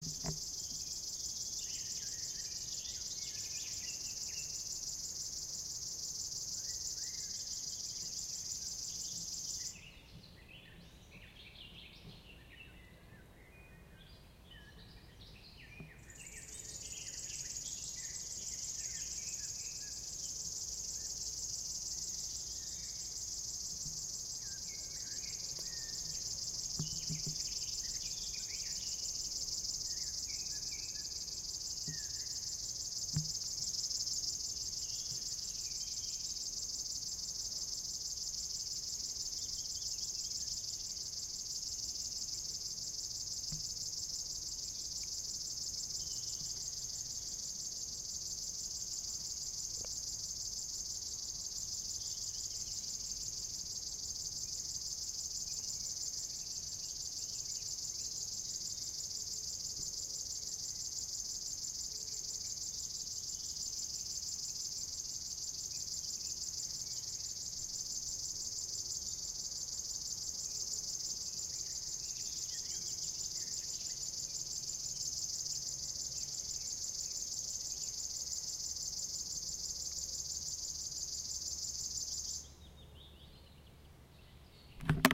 Common grasshopper warbler singing
common grasshopper warbler bird making continuous machine kind of sounds
bird, singing, sound